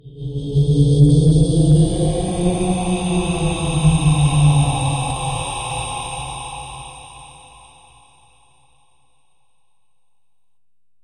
noise ghostly 2
horror, noise, ghostly